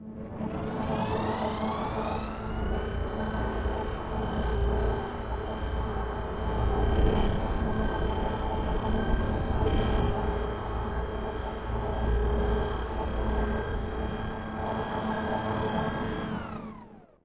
DS.Catamarca.Trip.7
Trip.7 Acceleration
remembering a Trip with “DONPEDRO”, at some great landscapes at Catamarca. Re-Sample of File=44289. Using SoundForge Process, Effects, tools in a RANDOM WAY, Just doing some “Makeup” at them
ambient, atmosphere, field-recording, noise, processed, sound